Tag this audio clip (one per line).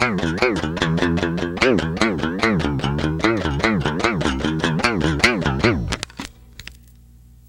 broken
guitar
note
notes
rhythmic
warble